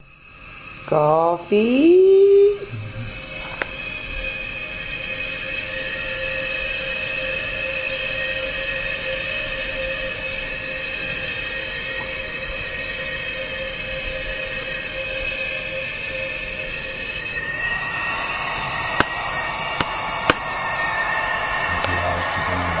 coffee remix, coffee is coming!
dragnoise, coffee, machine, noise